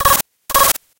Machine Alarm glitch sound.